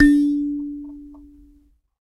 SanzAnais 62 D3 bz b
a sanza (or kalimba) multisampled with tiny metallic pieces that produce buzzs
african, percussion, sanza